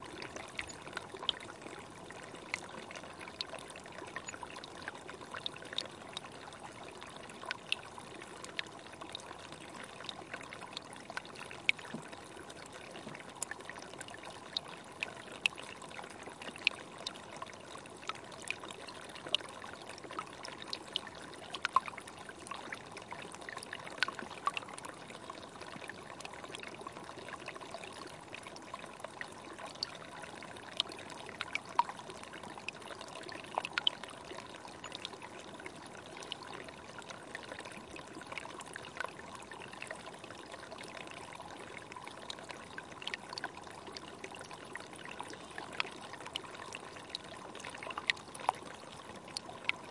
Water trickling beneath a field of boulders.